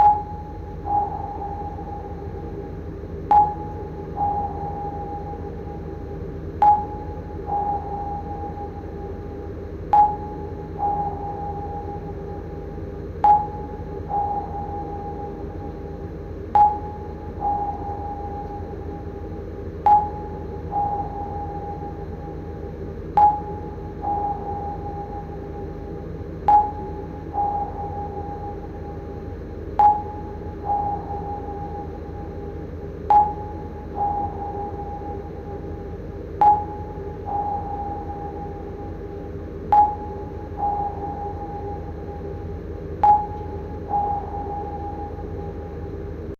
Messing around in Logic with some surround impulse responses and delay designs.
Ended up with something that sounded a bit like a submarine sonar blip.. so I added the sound of a fridge, time stretched using the flex tool and pitch adjusted and now it sounds almost real :)
Echolocation, Sonar, Submarine, Submarine-Echo